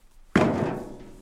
painfully punching metal door
metal, punching, door, painful